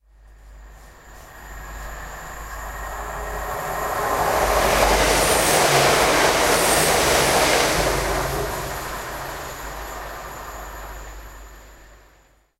railway train passing 2
The sound of a fast train going through a station (recorded on the platform) from right to left - a diesel locomotive, I think
railway-train, passing, locomotive, train